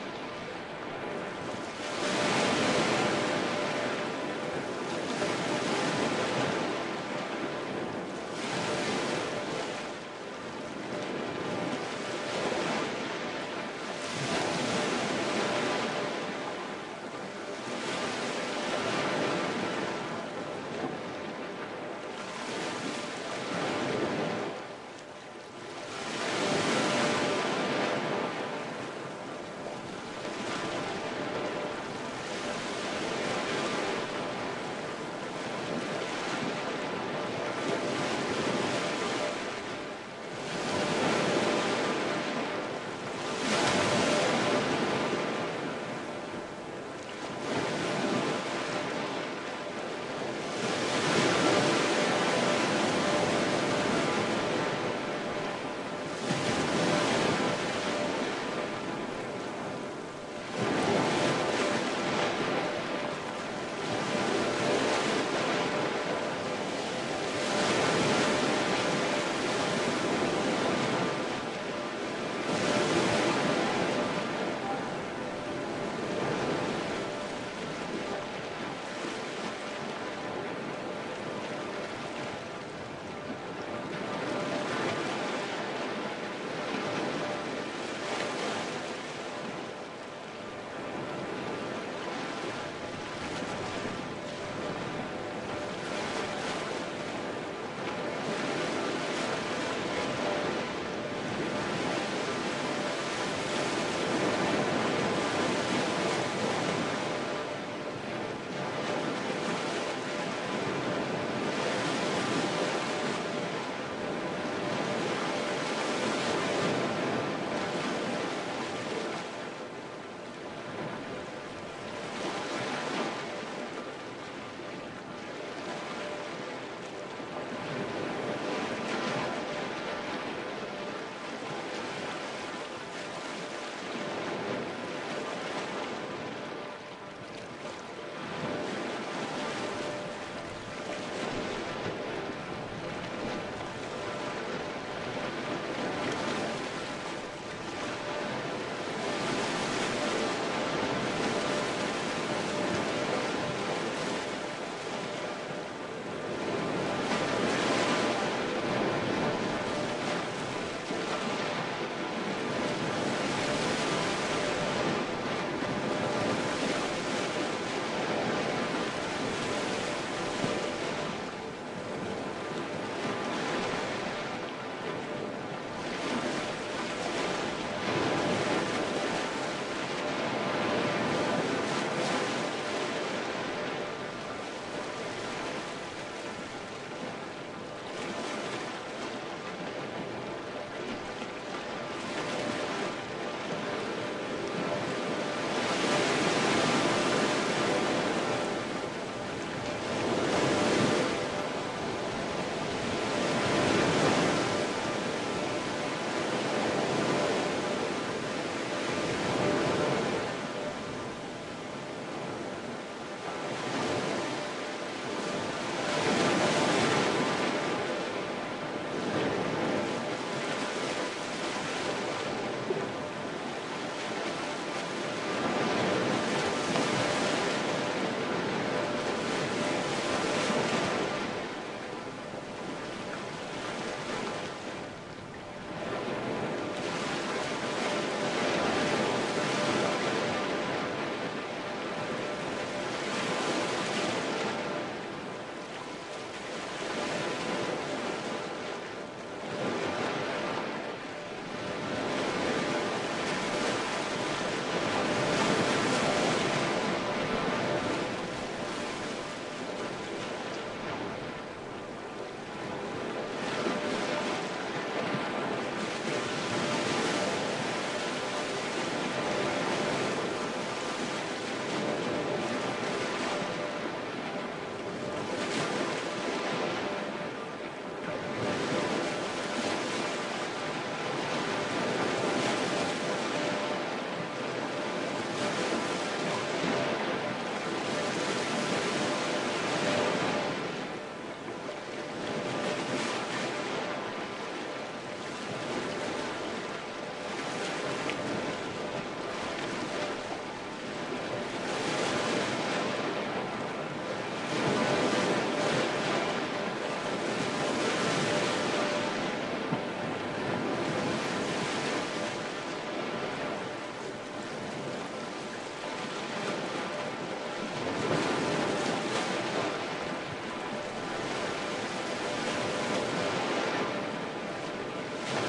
this is a recording of a Venezuelan beach, processed with a few pluggins from ableton live 9.